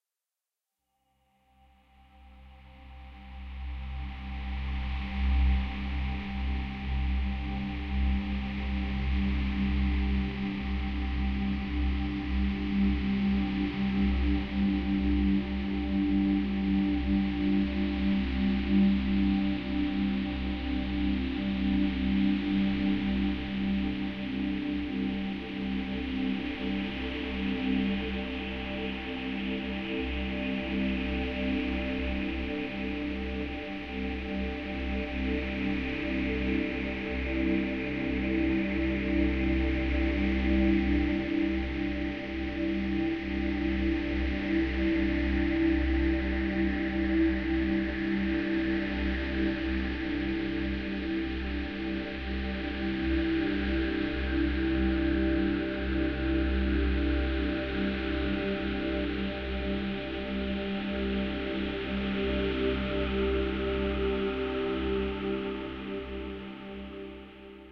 cine background8
made with vst instruments
music, scary, drama, deep, horror, sci-fi, spooky, ambience, atmosphere, thrill, background, space, cinematic